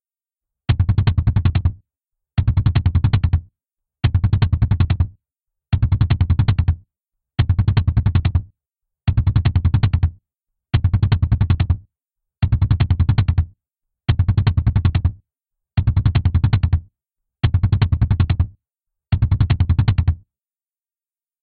super11beat
Super fast beat. Repeated beat clip. Created with Musescore. Modified with Audacity. Might be useful for something.